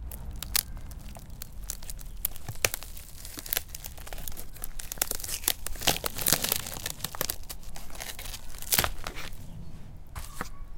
fs-rippingbark
Dried tree bark being ripped and broken by hand. Recorded on Zoom H4. Light compression.
bark, tree